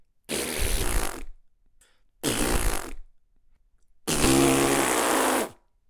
Edited raspberries
Spitting sound - some over-modulation happened during recording - this has been slightly edited out. Recorded with H4 on board microphone.